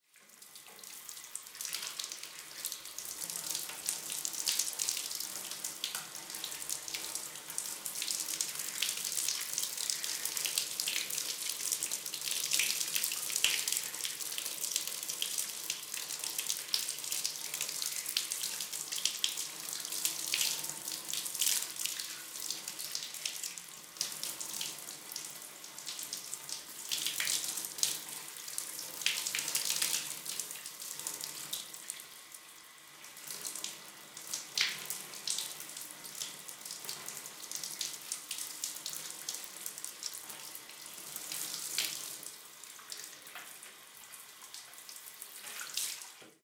In the shower recorded on DAT (Tascam DAP-1) with a Sennheiser ME66 by G de Courtivron.
bathroom, field, recording, shower